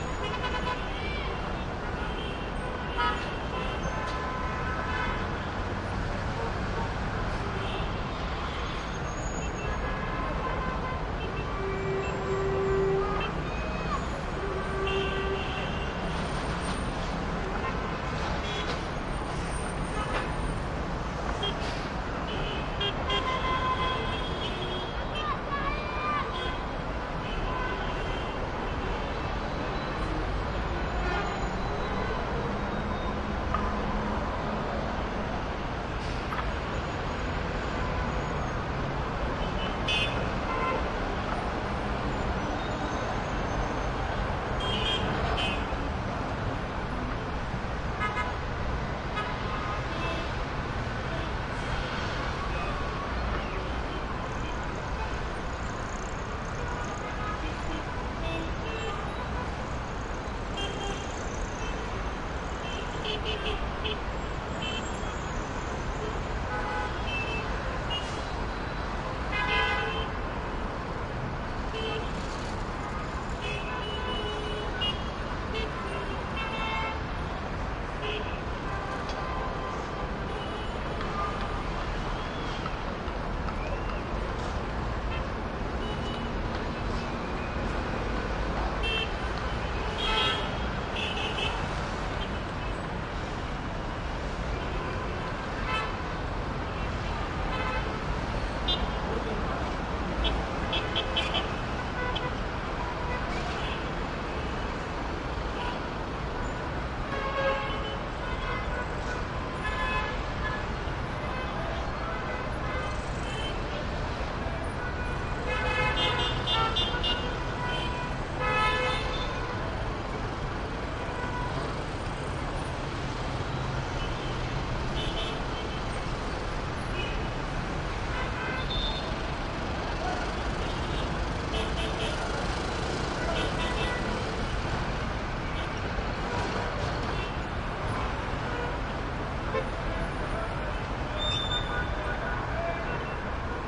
skyline Middle East distant traffic horn honks and city haze12 Gaza 2016
city, distant, East, haze, honks, horn, Middle, skyline, traffic